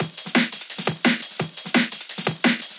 That rolling and high quality TOP DRUM LOOP You can hear in top of neurofunk tunes.
Unstoppable TOP DRUM LOOP.
And this is absolutely FREE.
Also we use filtered oldschool (Amen Break) for groove.
All sounds was mixed in Ableton Live 9.
24.12.2014 - date of creating.
2015, beat, breakbeat, dnb, drum, drumloop, emperor, loop, mefjus, new, noisia, perc, percussion, percussion-loop, rhythm, top